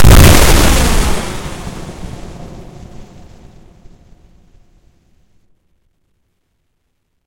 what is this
A totally synthetic explosion sound that could be the firing of a large gun instead of a bomb exploding. Unlike the lower-numbers in this series, this one's over-driven distortion portion is longer, compared to a relatively shorter reverberance. This creates a more greater sense of ripping power during the blast. Nevertheless, it could very well be a shell exploding or being fired (by artillery or a tank, or whatever you want). Like the others in this series, this sound is totally synthetic, created within Cool Edit Pro (the ancestor of modern-day Adobe Audition).
gun, good, bomb, fireball, blast, synthetic